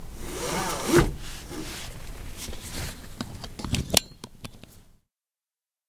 Car seat belt being pulled out and hooked into lock.